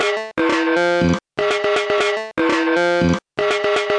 A kind of loop or something like, recorded from broken Medeli M30 synth, warped in Ableton.
loop, lo-fi